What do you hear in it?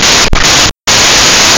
Weird Static
This is the sound that played when I tried to open a picture as Raw Data in Audacity.